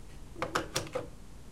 deck noise
Sounds like a button is being pressed. I forgot what this is a recording of.
analog, button, cassette, deck, record, tape, wooden